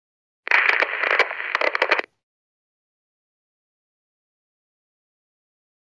RADIO PUSCH 01 No13.L
Radio interference in UHF
40, DR, interference, mobile, phone, Radio, ring, sms, speakers, Tascam, UHF